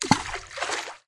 Water + rock = plop! Recording chain: Rode NT4 (stereo mic) - Sound Devices MixPre (mic preamp) - Edirol R09 (digital recorder).